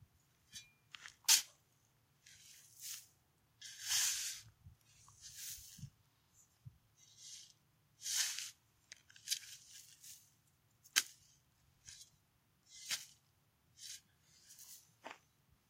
Shovel Dirt
Shoveling dirt. Cleaned in Audacity.
digging, dig, dirt, earth, shovel, scraping, scrape, ground